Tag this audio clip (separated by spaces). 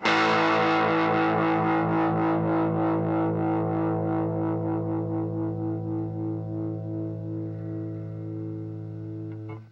guitar distortion power-chords chords miniamp amp